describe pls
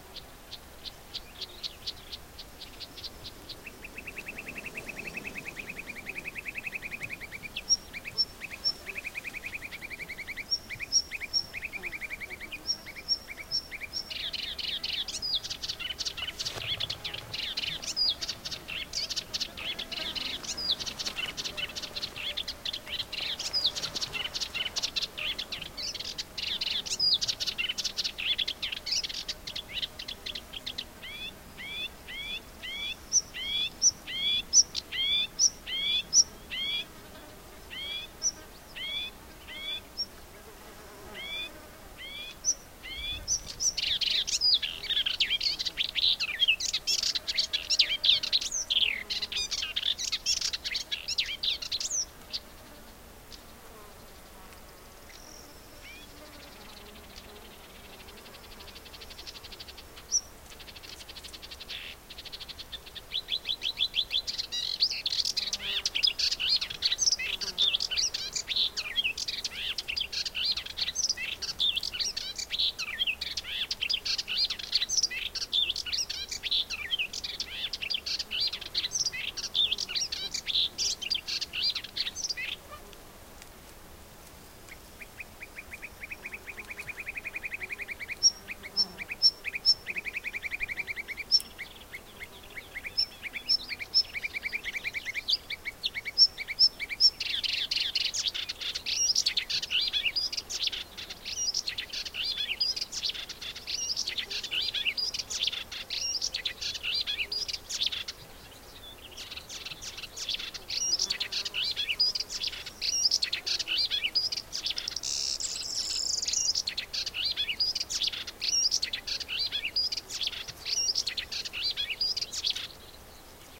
high-pitched, very varied call of a bird. It was perched and very visible, sorry I can't say his name. Recorded in the scrub that surrounds a shallow pond in Doñana Natl Park, south Spain. Sennheiser ME62(K6)>iRiver H120 /canto de un pajaro del que no se el nombre. Matorrales alrededores de una laguna, Doñana
birds, donana, field-recording, nature, spring, tweet